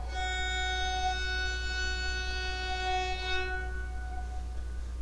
Tenor Nyla F#5
A bowed banjo from my "Not so exotic instruments" sample pack. It's BORN to be used with your compositions, and with FL Studio. Use with care! Bowed with a violin bow. Makes me think of kitties with peppermint claws.
Use for background chords and drones.
banjo, violin-bow